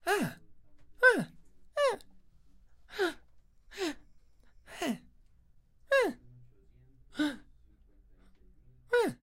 A happy expression.
surprise, foley, female